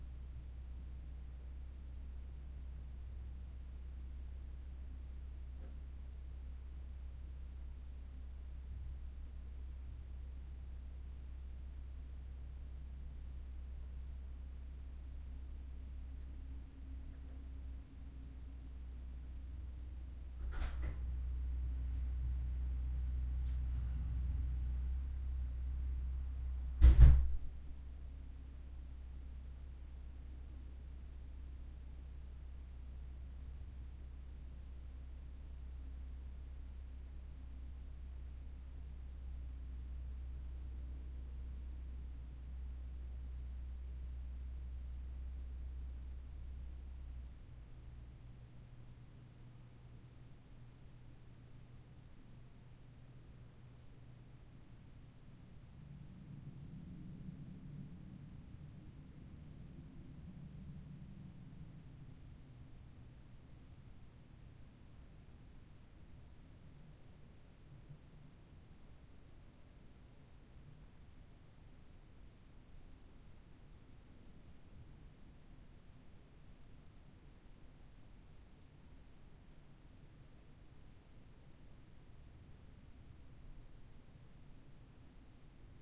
Ambience recorded in one of the rooms upstairs with a Zoom H1.
Front door is opened and closed.
Also some airplane noise.